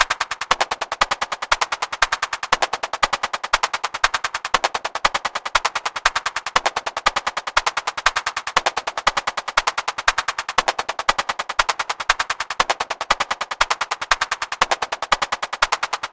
Drum Loop Solo Clap - 119 Bpm
bpm, 119, solo, loop, clap